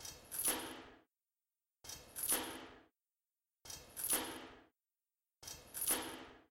HAMOUDA Sirine 2014 2015 Chains
Third track (1 second)
I recorded a chain’s sound and used an Fade Off effect to the end. I increase volume to 65%.
Typologie (Cf. Pierre Schaeffer) : X’ (Impulsion complexe) + N (continu tonique)
Morphologie (Cf. Pierre Schaeffer) :
1- Masse:
- Son "seul tonique"
2- Timbre harmonique: éclatant
3- Grain: rugueux
4- Allure: pas de vibrato
5- Dynamique : l’attaque du son est violente et continu
6- Profil mélodique: glissante, avec plusieurs séparations
7- Profil de masse
Site : 1 strat de son.
Calibre :
steel, chain, clang, metallic, iron, metal